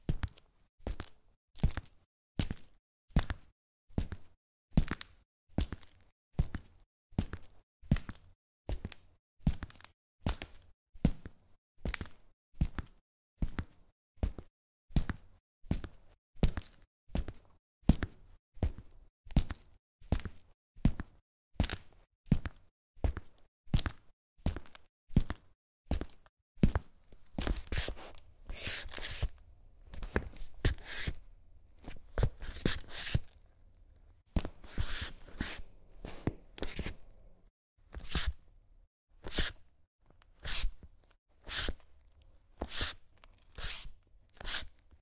footsteps parquet
Slowly walking on a wooden floor wearing leather shoes.
EM172 (on shoes)-> Battery Box-> PCM M10.
walk, parquet, slowly, floor, Foley, wooden, walking, flooring, slow